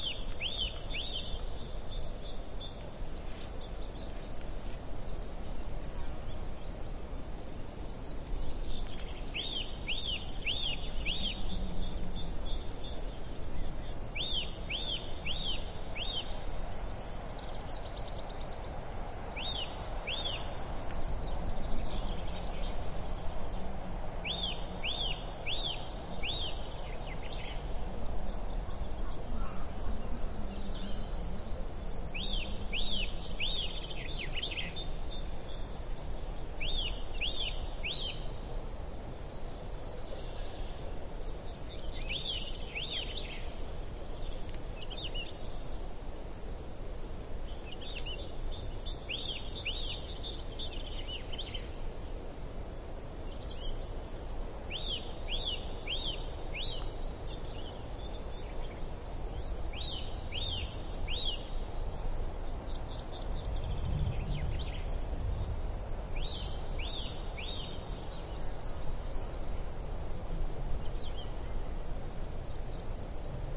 20100424.pine.forest.01
Pine forest ambiance, with birds singing and soft murmur of wind on the trees (crank your amp up, you won't regret it). Shure WL183 mics, Fel preamp, Olympus LS10 recorder. Recorded at Sierra del Pozo (Jaen, S Spain) while climbing to Cabañas Peak